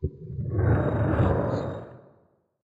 Rock Sound Effect made with porcelain and rough ground.
sfx, fx, soundeffect, effect